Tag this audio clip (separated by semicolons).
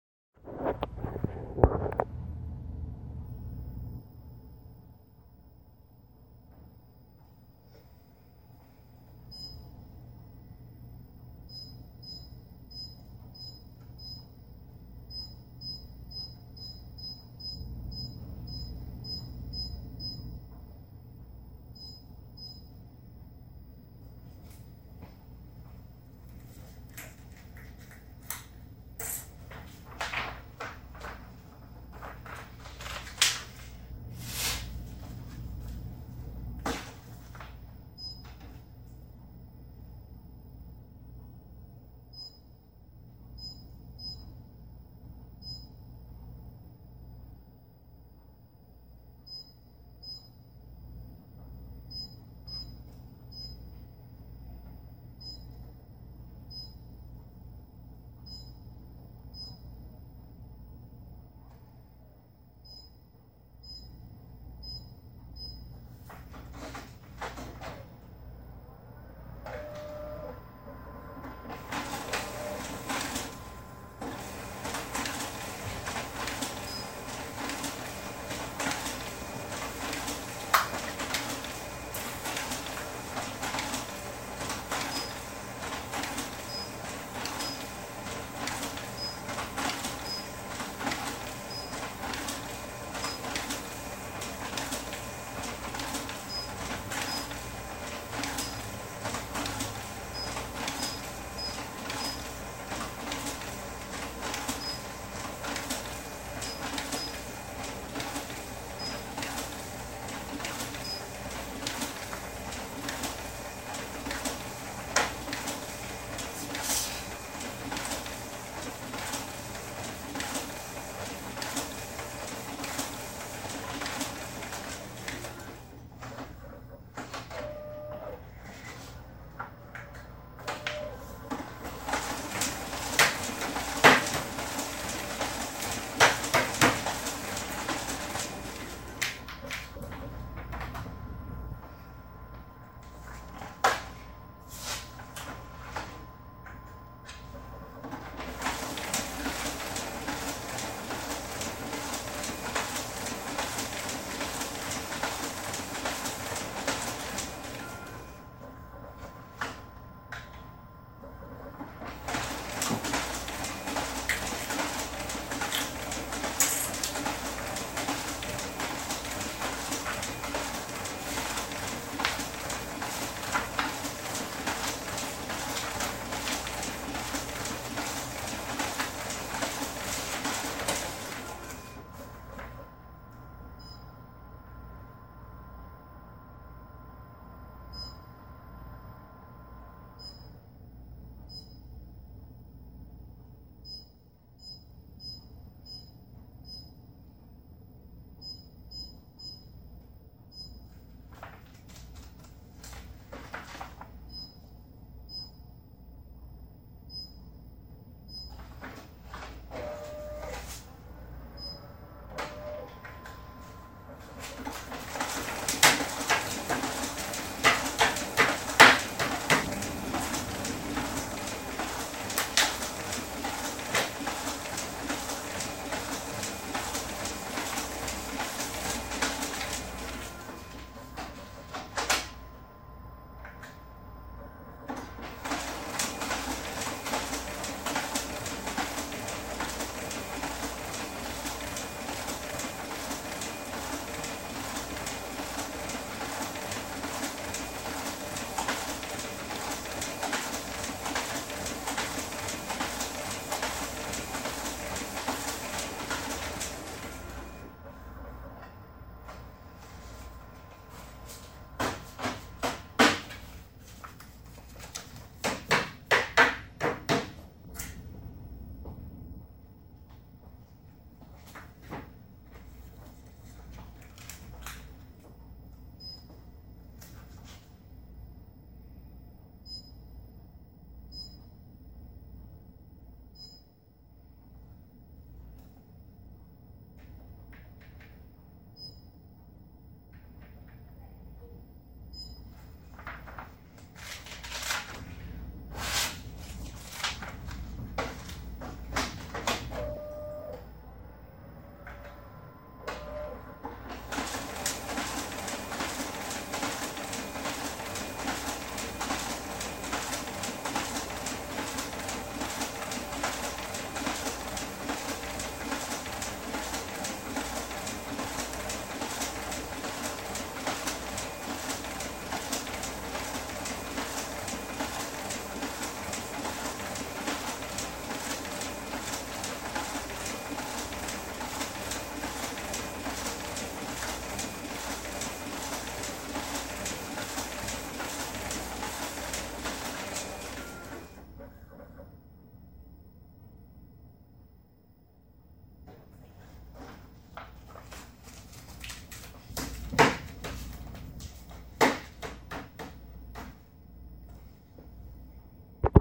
copier; copy-job; Copy-Room; office